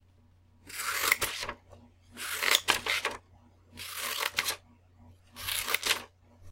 Scissors cutting paper. Recorded at home on Conexant Smart Audio with AT2020 mic, processed with Audacity. Noise Removal used.
art, craft, cut, cutting, paper, rip, school, scissors, shred